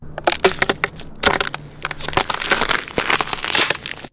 Ice 8
Derived From a Wildtrack whilst recording some ambiences
snow, field-recording, step